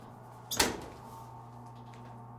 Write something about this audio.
opening a dryer